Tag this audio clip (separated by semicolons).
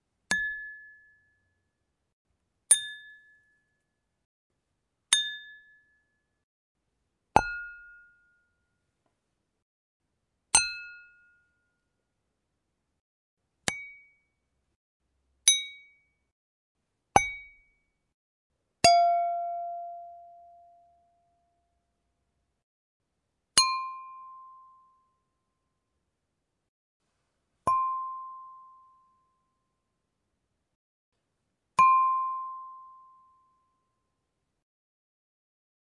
hit; close-up; copa